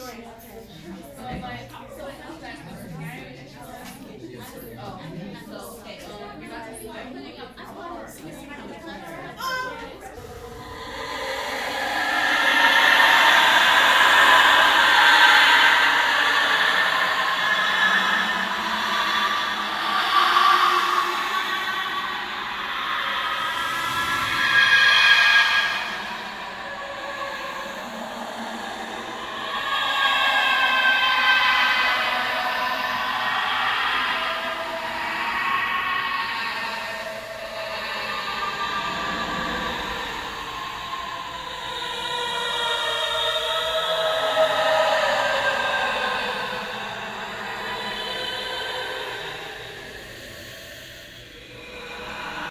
Ghostly Ghouly Screaming
Paulstrecthed recording of a room of people laughing (Audacity). Sounds pretty creepy.
screams, eerie, ghosts, demons, hell, creepy, laughs